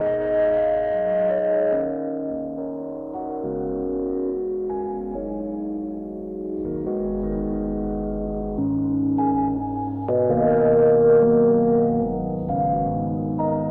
Rhodes Loop 2 (140bpm)
Loop, Ambiance, commercial, atmosphere, Drums, Looping, Ambience, Cinematic, Sound-Design, Piano